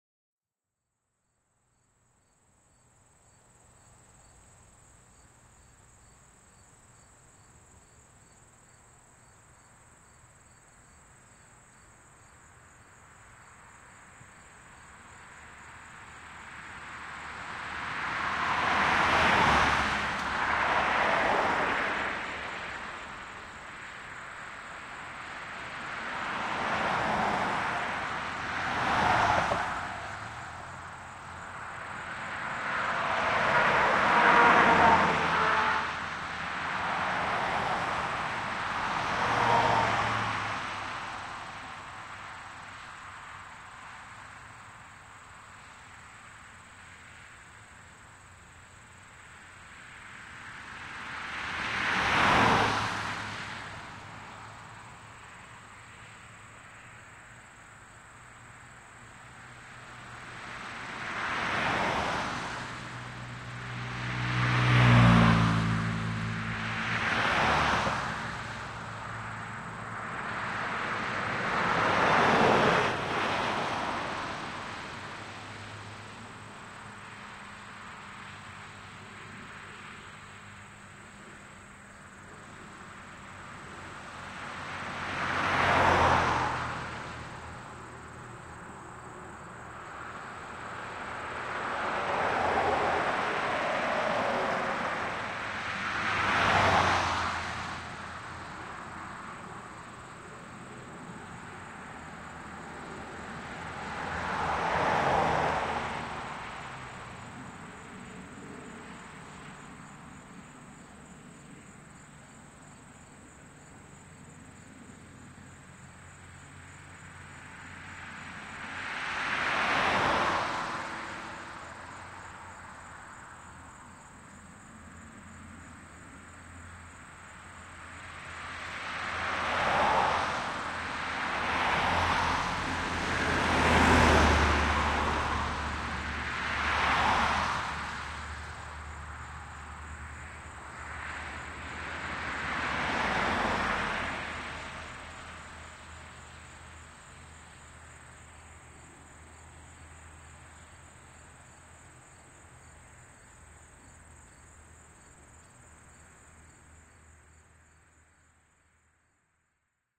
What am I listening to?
sample pack.
The three samples in this series were recorded simultaneously (from
approximately the same position) with three different standard stereo
microphone arrangements: mid-side (mixed into L-R), X-Y cardioid, and
with a Jecklin disk.
The 2'40" recordings capture automobile traffic passing from right to left
and left to right directly in front of the microphone
on a country road in New Jersey (USA) on September 9, 2006. There
is considerable ambient sound audible, mostly from a proliferation of crickets.
This recording was made with a pair of Sennheiser MKH-800
microphones in a mid-side configuration (inside a Rycote blimp).
The "mid" microphone was set to "wide cardioid" and the "side"
was set to "figure-8" and connected to a Sound Devices 744T
ms, crickets, country-road, m-s, cars, road, mid-side, traffic, sennheiser, field-recording